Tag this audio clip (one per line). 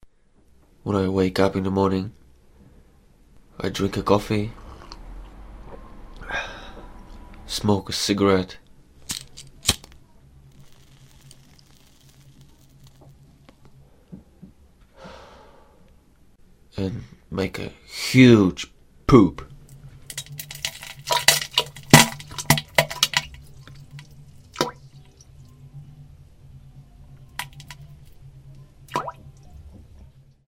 cigarette
coffe
morning
needs
poop